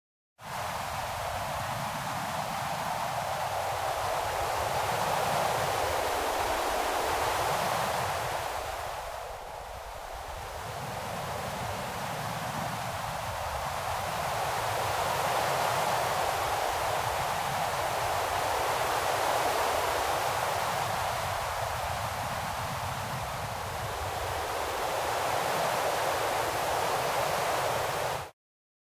Icy wind
chilling, cold, freeze, frost, ice, icy, snow, wind, winter